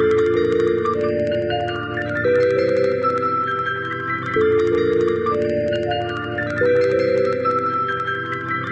(Really bizarre echoes, can’t describe in my poor English :) Perfect loop.